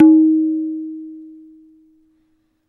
Ringing stroke tun on the right tabla drum, dayan.
hindustani, drum